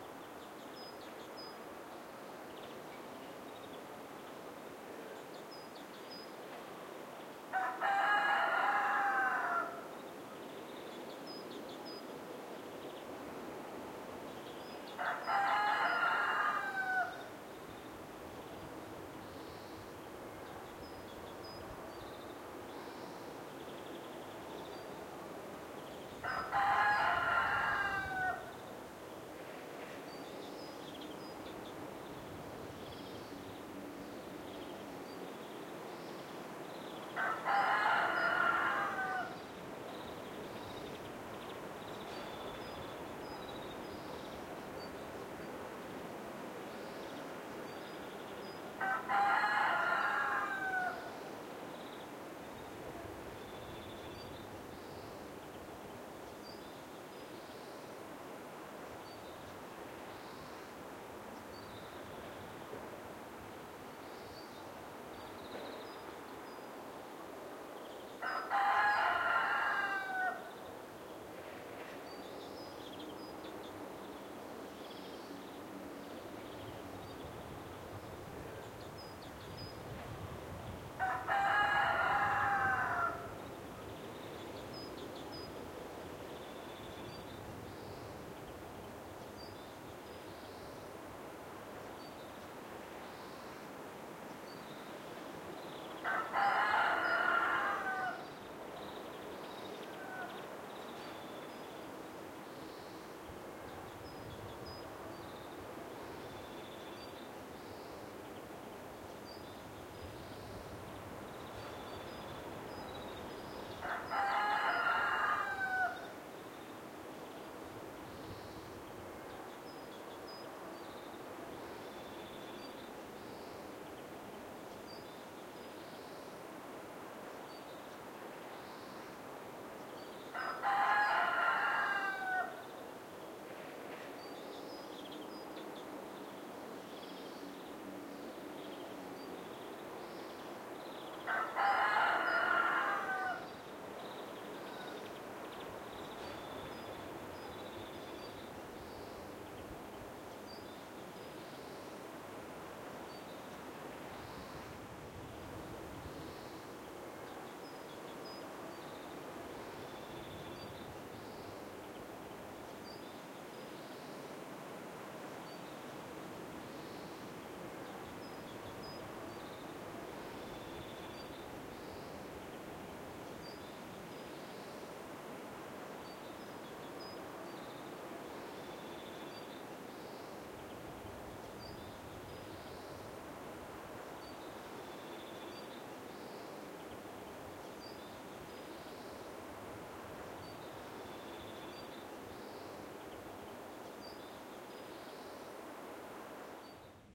Village ambience rooster and light birds with wind
Zoom h4n recording of morning ambience alive with a lot of village ambience including crickets, birds , wind